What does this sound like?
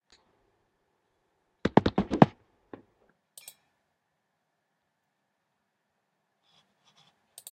cartoon running
i was looking for a cartoon novelty running effect and i just couldn't find one so i made one myself.
cartoon, free, running, royalty, cartoon-running